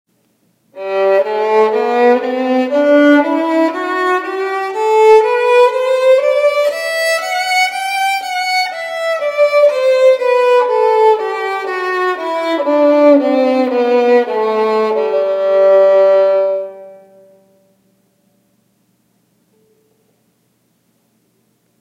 Violin Scale
A 2 Octave G Major Scale played by me (On my Violin), then Added some Church Hall Reverb in Audacity.
G-Major-Scale, Reverb, String-Instrument, Violin